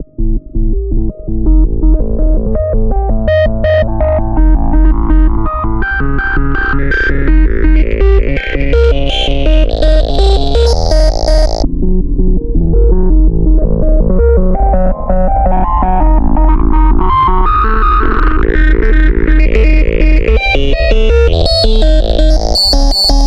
Analog Seqencing and Digital Samples
processed, Sampler